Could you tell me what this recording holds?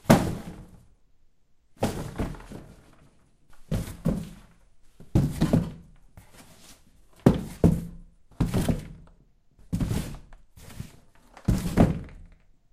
An empty cardboard box being dropped on other cardboard boxes and on wooden floor.
box, cardboard, collision, impact, paper, stereo, thud